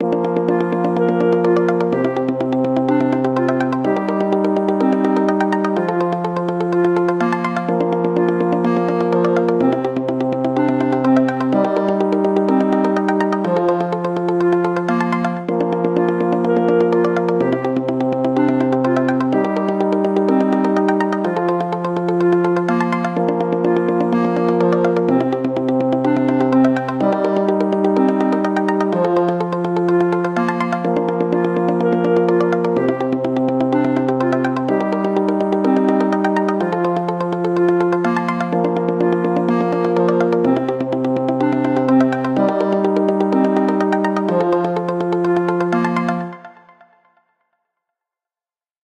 Little-village
Probably best fit for game env where player would takes a break after battle with a ʕ•ᴥ•ʔ while sightseeing a nature or village ~(˘▾˘~) -------- ¯\_(ツ)_/¯
music, game, melodic, village, Arpeggio, background